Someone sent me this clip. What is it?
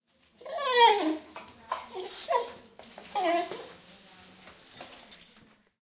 02-Dog yelping
Dog is yelping and walking
CZ, Czech